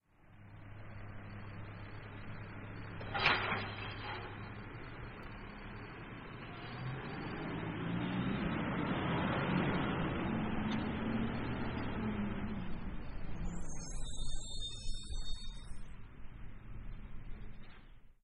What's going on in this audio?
Refuse Truck Squeeky Brakes
Our local refuse truck. Squeaky brakes
brakes, mechanical, refuse, squeaky, truck, vehicle